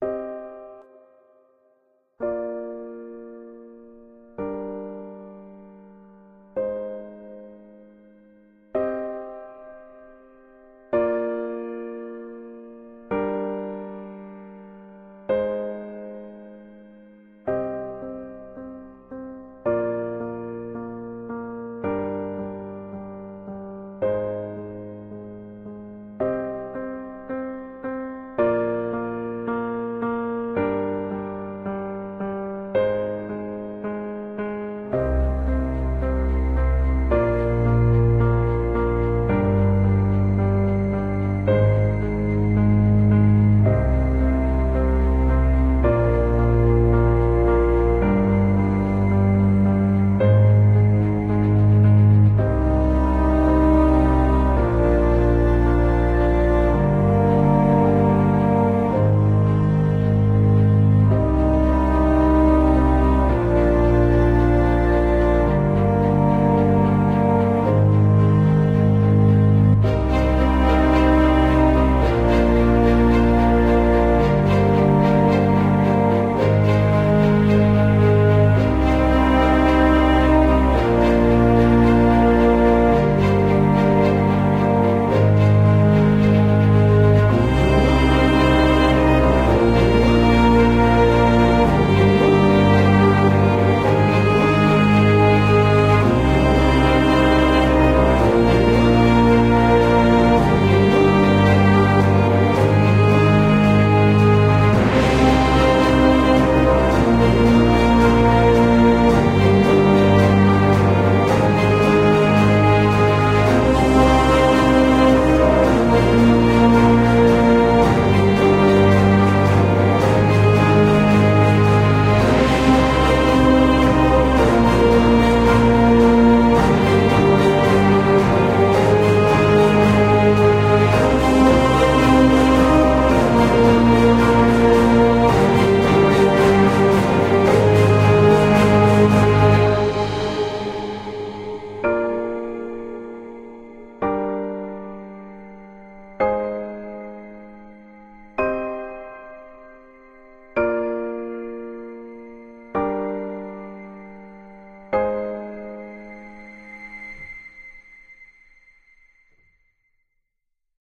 Emotional Uplifting Soundtrack - For Her
hans, violin, dramatic, zimmer, cinematic, sad, bass, orchestra, inception, movie, uplifting, emotional, soundtrack, romantic, film, strings, outro, orchestral, score